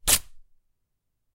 Tearing up cloth.